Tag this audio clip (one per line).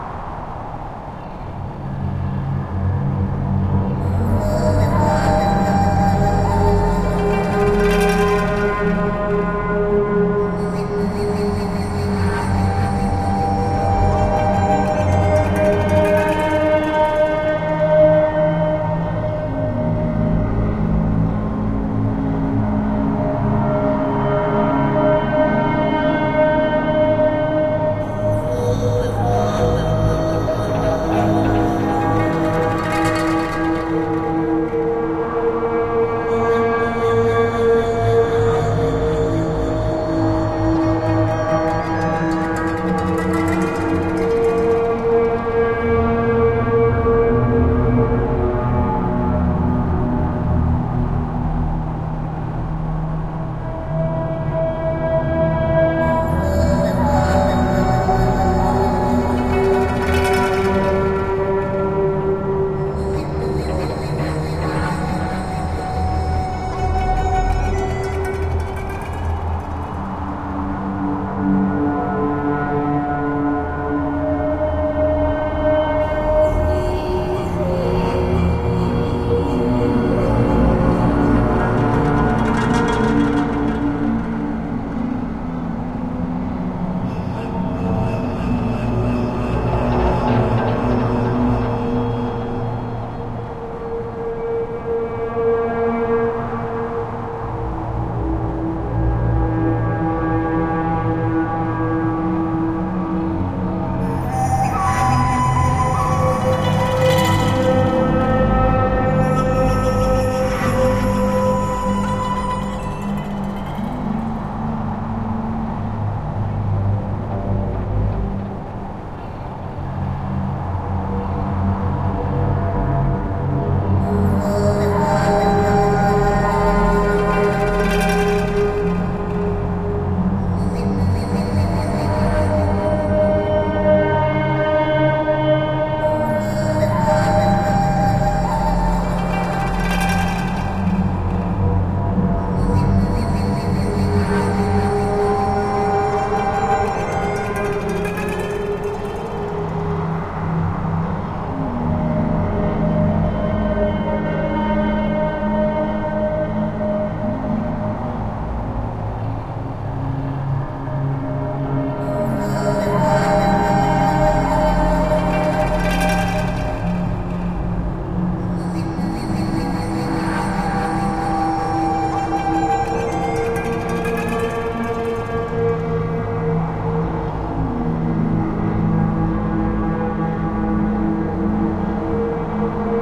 Ambient
Asia
Asian
Atmo
Atmosphere
Cinematic
Dark
Fantasy
Film
Horror
Mood
Movie
Myst
Mystery
Oriental
Sad
Thriller
Wind